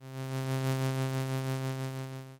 Retro Noise 01
Noise with low tapping sounds in between.
Thank you for the effort.
8bit, computer, cool, effect, game, old, original, retro, sample, school, sound, tune